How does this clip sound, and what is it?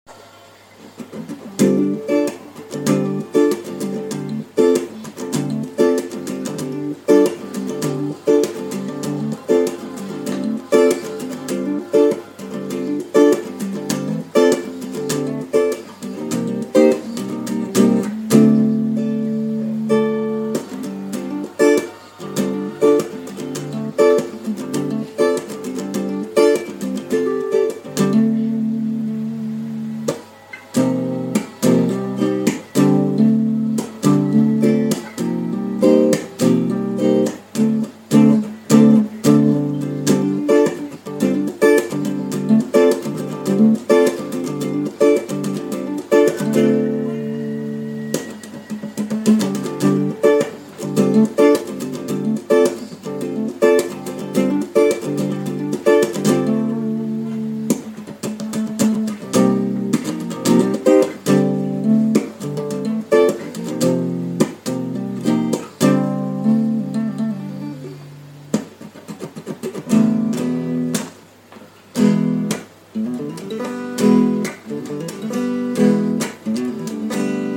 My aCoustic funk
Uneek guitar experiments created by Andrew thackray
Guitar, strings